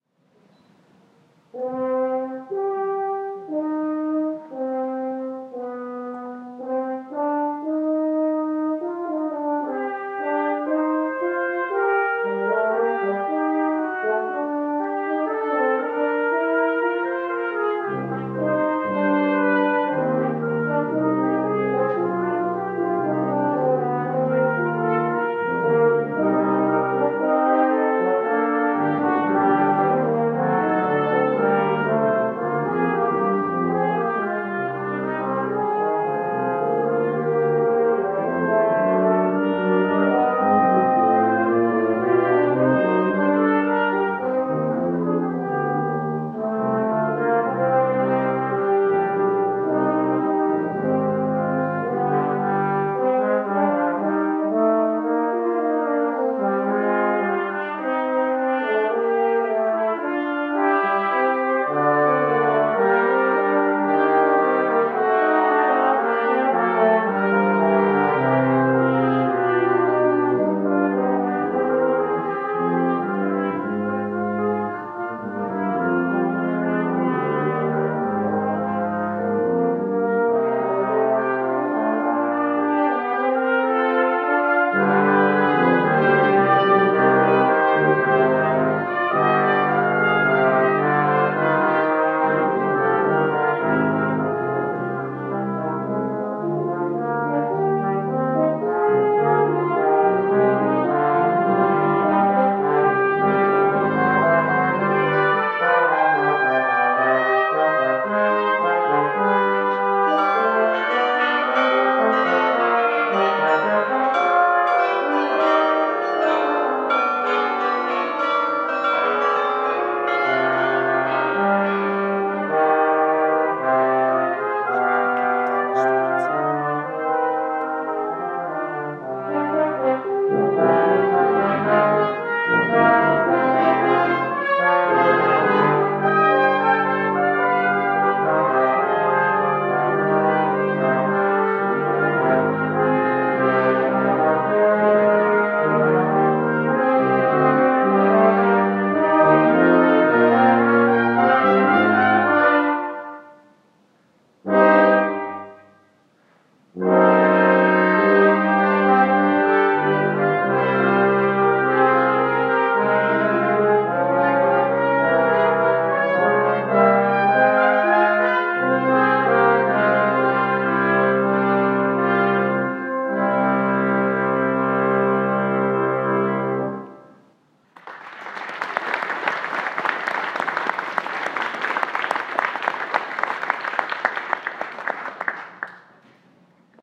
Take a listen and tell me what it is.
concert church yard
We attended a classic concert in a garden of a church, I believe it was in Dordrecht.
church, classic, concert, garden, music, outside